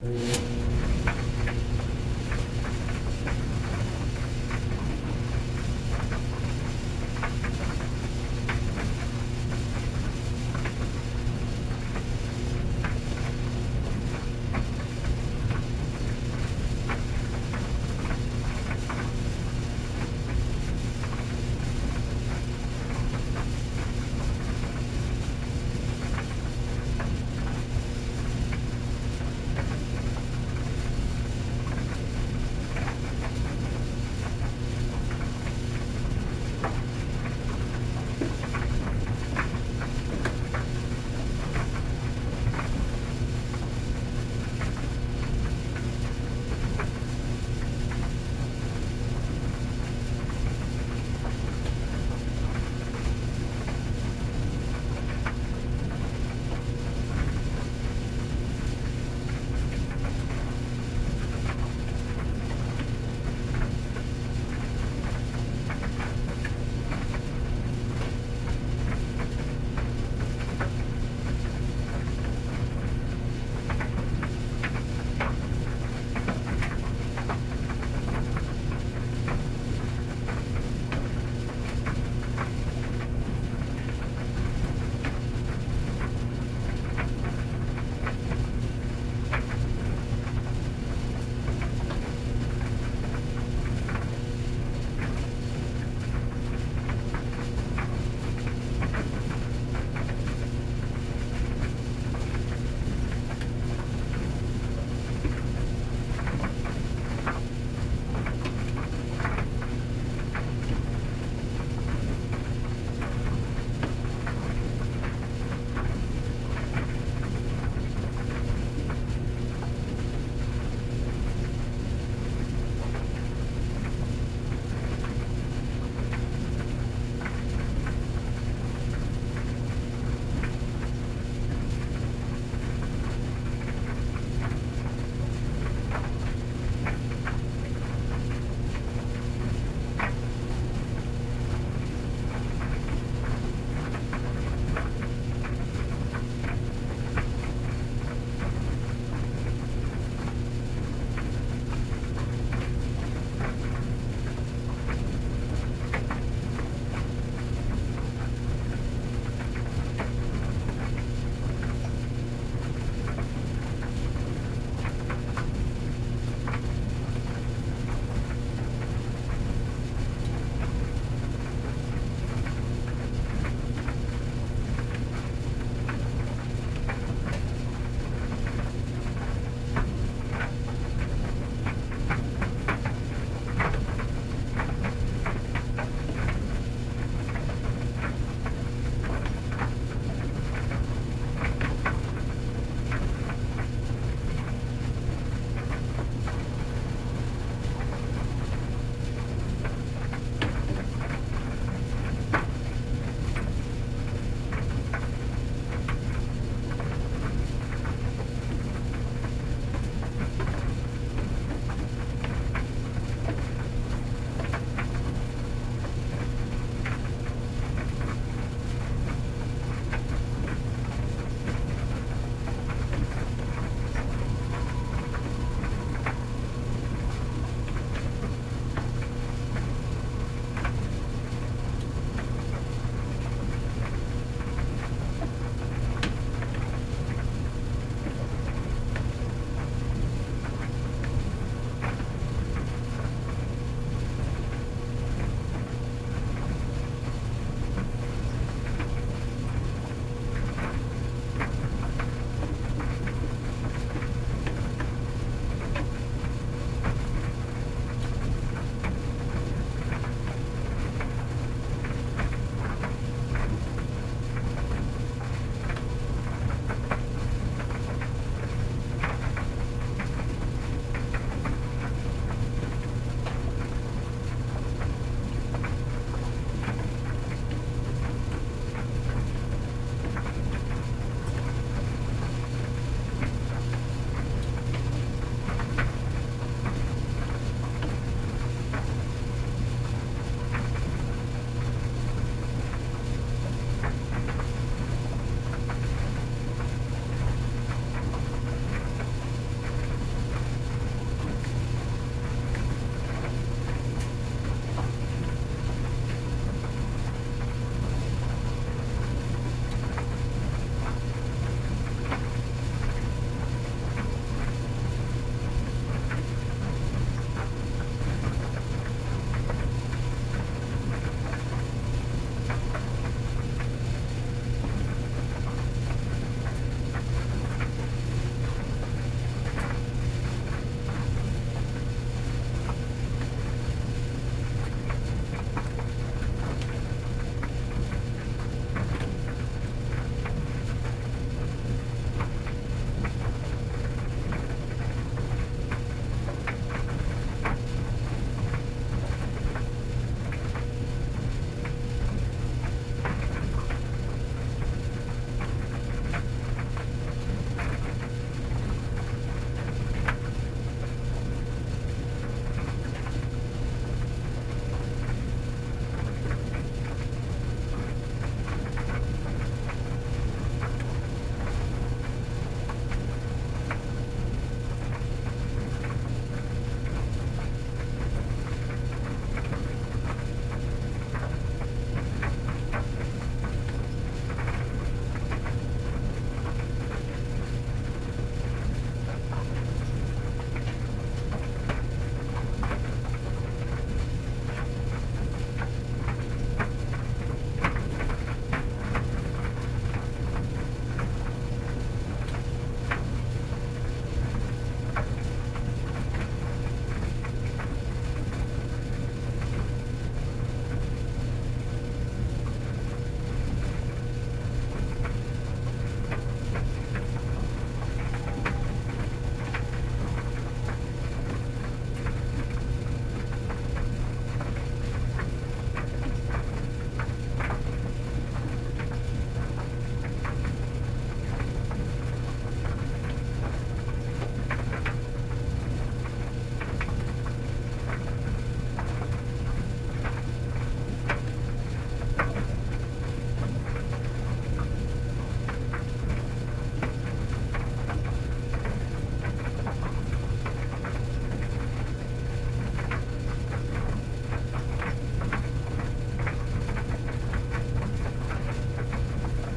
A long recording of my dryer running with a few clothes on. I noticed there were a lot of dryer recordings, but none were very lengthy, so for those of you who need a larger file, here you go!
Recorded on a AT3525 mic
recording, noise, field-recording, clothes, ambiance, laundry, dryer, long, washer, washing-machine